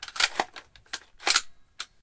Recorded from a steyr aug airsoft gun. Reload sound in stereo.
click, aug, rifle, magazine, airsoft, metal, gun, load, reload